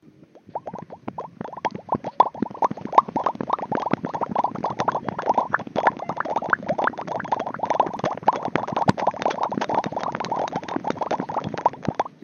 Here's some popping sounds I made out of boredom. I just used my mouth to do the popping sounds and edited all of them in Audacity.
bubble, bubbles, cartoon, effect, mouth, pop, popping, sound